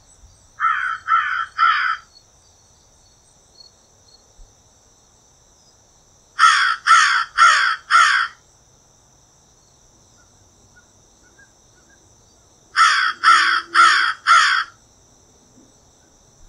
A single crow cawing at dawn.
bird, field-recording, field, animal, crow, nature